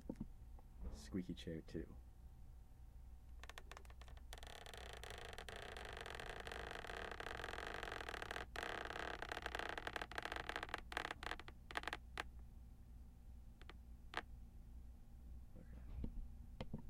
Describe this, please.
Squeaky Chair medium speed
Leaning back in a squeaky chair
squeaky, lean, chair